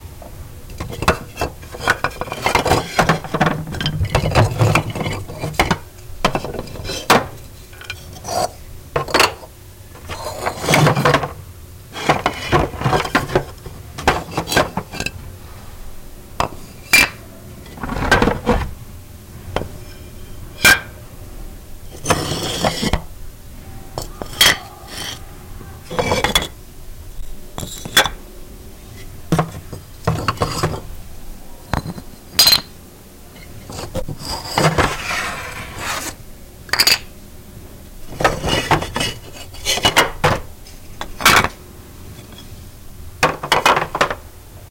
Moving rock holds in bucket